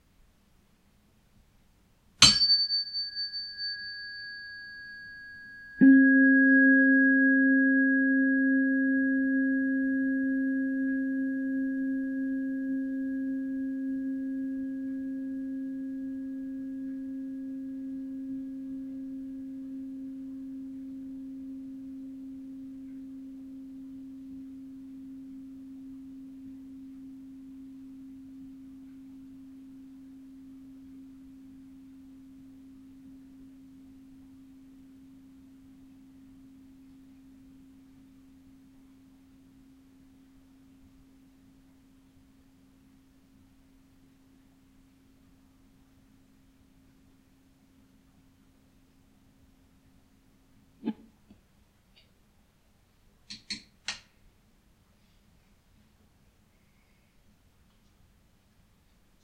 Tuning Fork and Ukulele
The sound of a tuning fork applied to a tenor ukulele.
Foley, Fork, Tuning, Ukulele